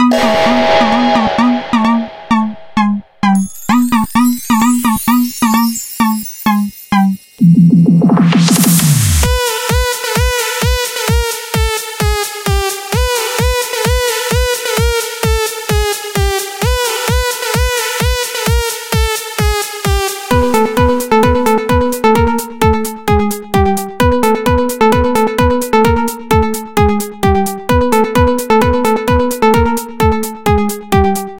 Touch Down Music EDM
Touch Down Drop Music
hop, music, edm, touchdown, hip, beat, club, trance